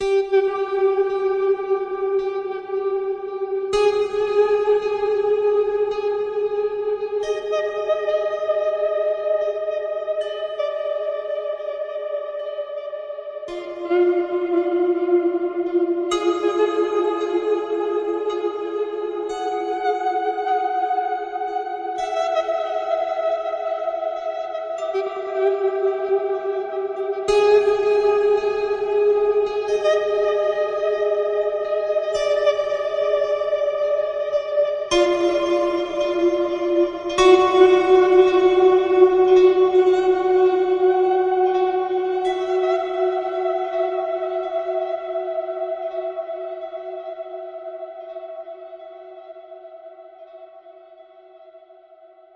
A tune I made on a string instrument in GarageBand for something called Victors Crypt. There's a lot of reverb and chorus on it to make it sound real spooky. I think it could be used for something horror-like or mystic for instance. Hope you like it.